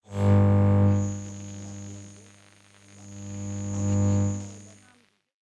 Radio Low Buzz 1
some "natural" and due to hardware used radio interferences